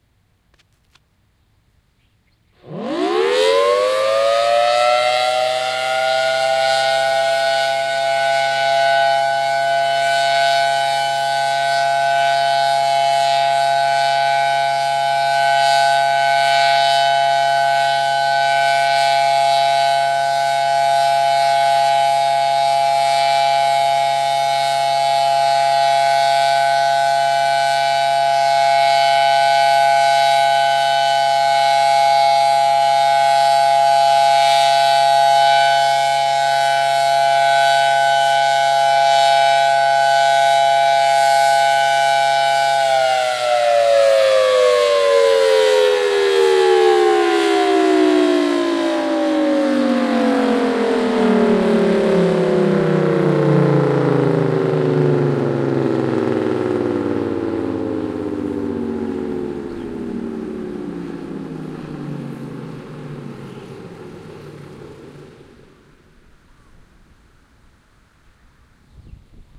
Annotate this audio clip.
10-01-08 Waikiki Thunderbolt 1000T high pitched
Wednesday, October 1st, 2008. 11:45am. Civil Defense monthly test of a Federal Signal 1000T (3-phase, 5/6 port ratio, "high" chopper voltage tap). This siren is located at the Waikiki Library. I was at about 100ft away from it. Used an Edirol R-09(AGC off, LOW CUT on, MIC GAIN low, INPUT LEVEL 30) + Sound Professionals SP-TFB-2 Binaurals.
Strangely higher pitched than other Thunderbolt 1000Ts. This one sounded but did not rotate, hence the steady sound output.